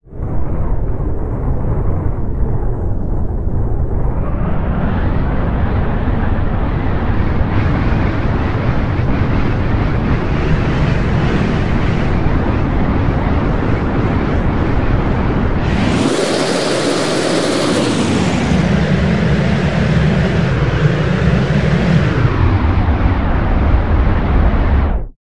granny glissando
Created with Granulab from a vocal sound. Messing with frequency of repeated grains.
wind, noise, synthesis, stereo, granular